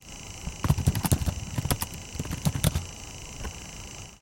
Mac iBook G3's cdrom working and producing various sound including some air noise from the spinning CD. This include a loud typing sound and some mouse clicks on the keyboard. Recorded very close to the cdrom with Rode NT1000 condensor microphone through TLAudio Fat2 tube preamp through RME Hammerfall DSP audio interface.